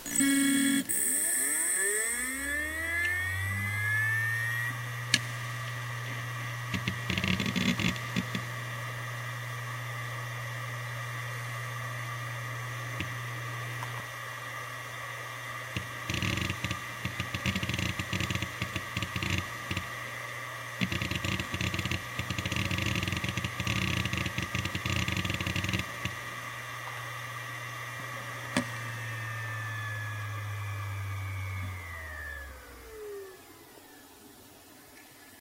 A Seagate hard drive manufactured in 2005 close up; spin up, writing, spin down. (st3320820as)